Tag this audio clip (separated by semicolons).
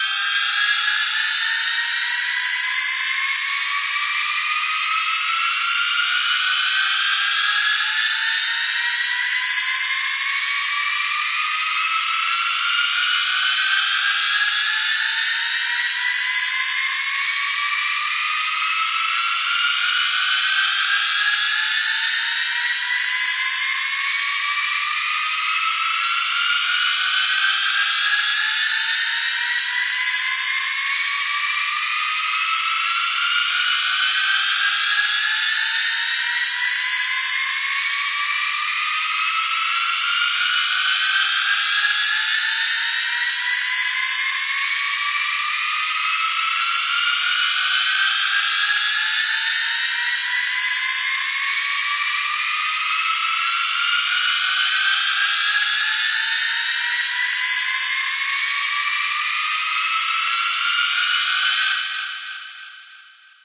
8; bar; ending; illusion; infinite; loop; looping; never; riser